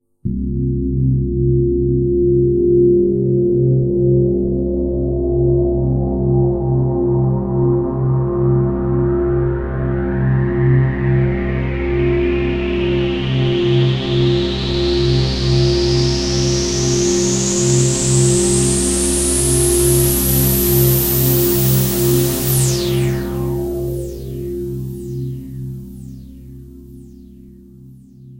White wave
Made on a Waldorf Q rack.
ascending, drone, synthesizer, whitenoise